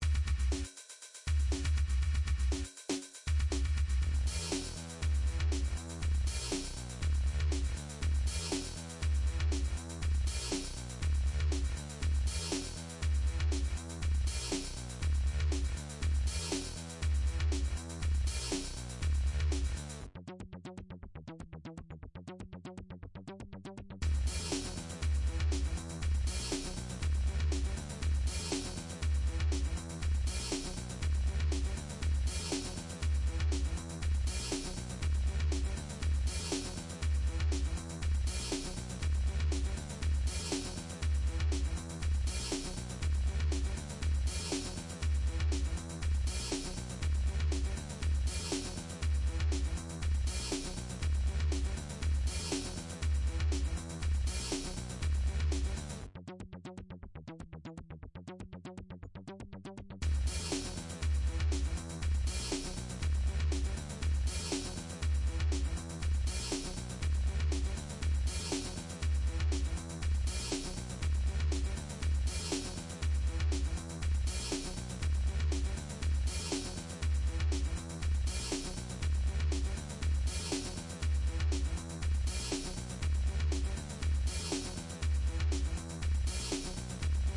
Last chance
Sad depressed beatz
depressed, Sad, beatz